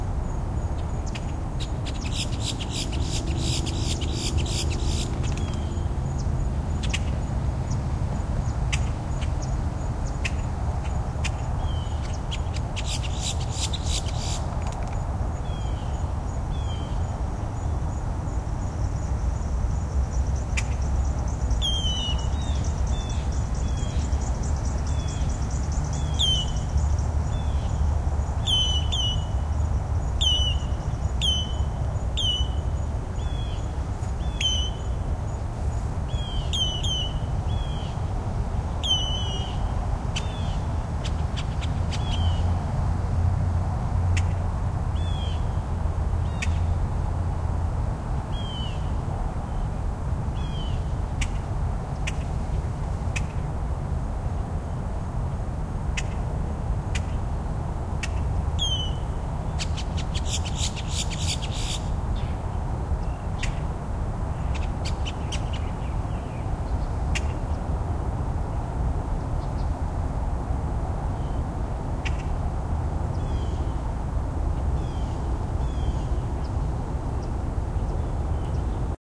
birds traffic
Traffic in the background while recording birds during early morning walk through a nature trail with the Olympus DS-40/Sony Mic.
animal, bird, birdsong, field-recording, song, traffic